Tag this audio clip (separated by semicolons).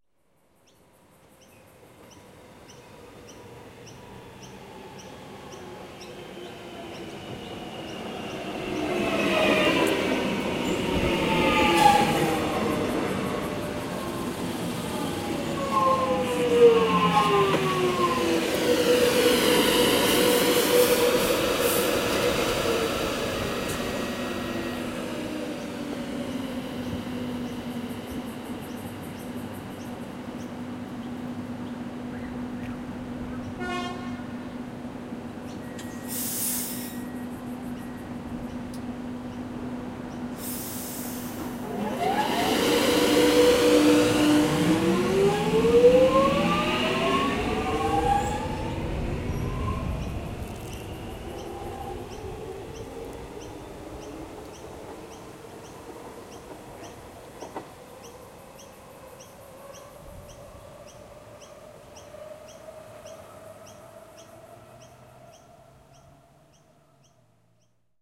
electric; gyro; rail; train; transport